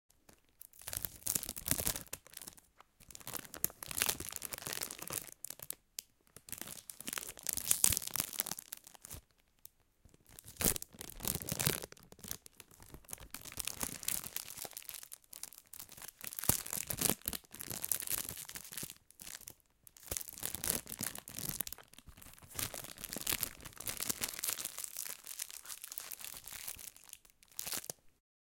Plastic packet crumpling
A small, thick plastic packet crumpled with fingers. Recorded with a Zoom H6 and an XY capsule.
Crumpling, OWI, Plastic-packet